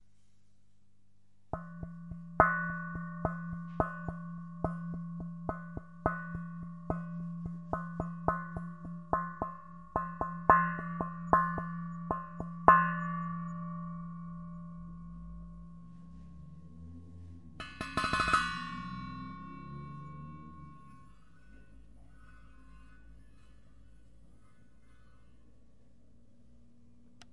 Kochtopf Groove
I was making noodles :)